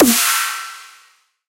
Xtrullor Snare 07
A free snare I made for free use. Have fun!